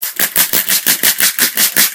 Aggressively spritzing a spray bottle
Like you're really mad at the plants, but you still want to feed them. (Or you're otherwise excising your aggression via watering plants.)